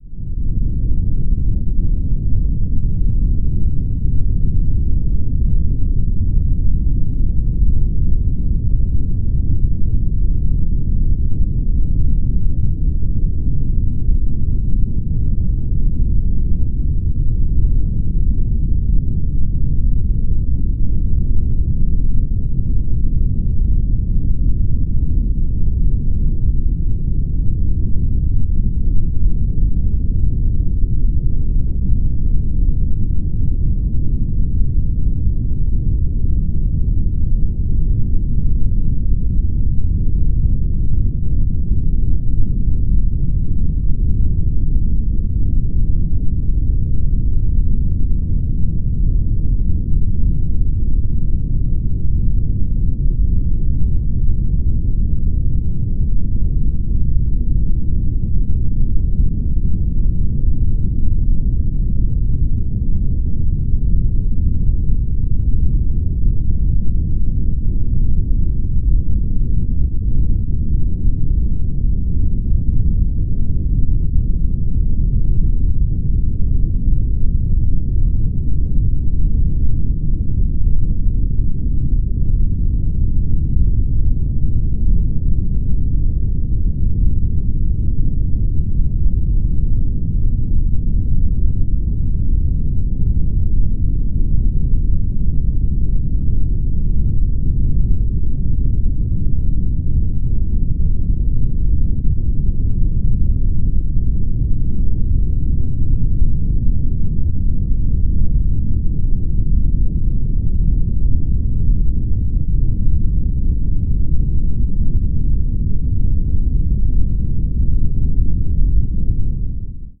Low rumble of an earthquake. Made in Audacity.
Hunting trolls since 2016!
BTC: 36C8sWgTMU9x1HA4kFxYouK4uST7C2seBB
BAT: 0x45FC0Bb9Ca1a2DA39b127745924B961E831de2b1
LBC: bZ82217mTcDtXZm7SF7QsnSVWG9L87vo23
deep, low-frequency, natural-disaster, quake, rumble, rumbling